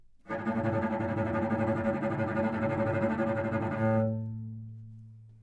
Part of the Good-sounds dataset of monophonic instrumental sounds.
instrument::cello
note::A
octave::2
midi note::33
good-sounds-id::2058
Intentionally played as an example of bad-dynamics-tremolo